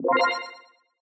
Spacey 1up/Power up
Your typical power up sound with space vibe
point, power, up